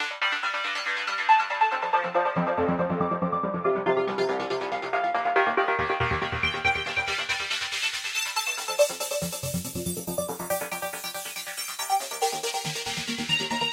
some pads arpeggiated to play together with a flange and phase effect on them. 140 BPM
melody, trance